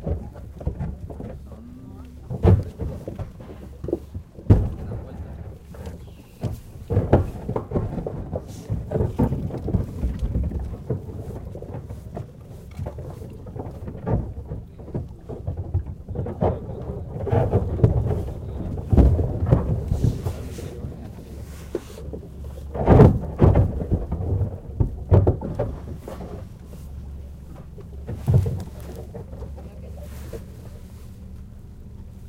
20070816.boat.ice.floats.00
large (to my eyes at least) pieces of floating ice strike the hull of a boat. Scary at first... and in the end. See what I mean:
Edirol R09 internal mics
sailing, flickr, fjord, rumble, field-recording, boat, ice